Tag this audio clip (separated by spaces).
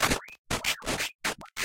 abstract digital effect electric electronic freaky future fx glitch lo-fi loop machine noise sci-fi sfx sound sound-design sounddesign soundeffect strange weird